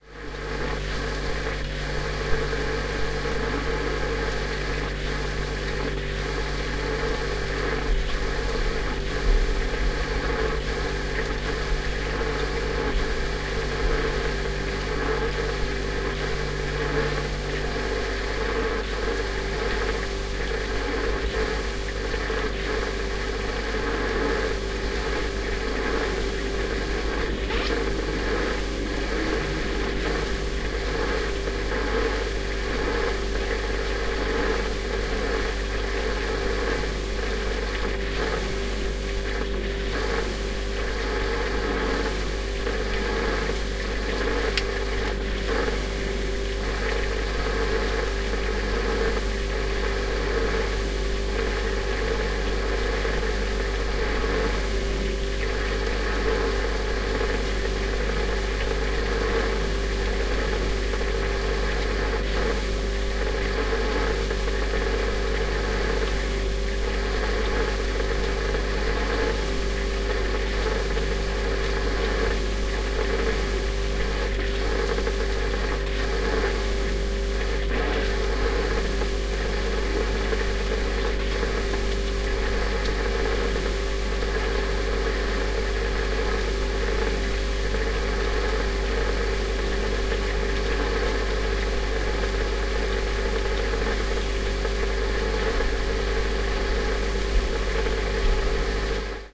Refrigerator from inside
Redorded inside an old, small and empty refrigerator. A boiling, gurgling, or knocking sound can be heard.
berlin, buzz, cold, drone, empty, field-recording, fridge, german, germany, gurgling, hum, inside, machine, noise, old, refrigerator, small